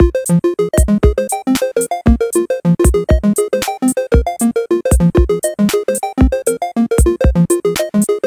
Electro-loop-102-bpm
ambient, electro, loop